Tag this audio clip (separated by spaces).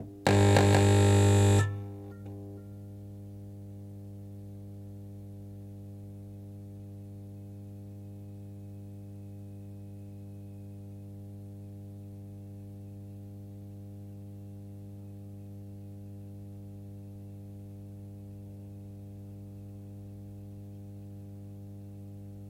buzz drone faulty fluorescent hum light starter turnon